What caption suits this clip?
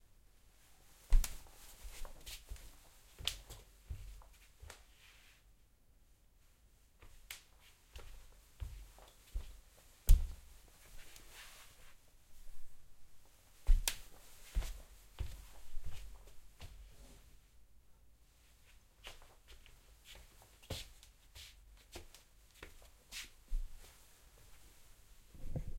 Foot walking on wood floor
steps, foot, ground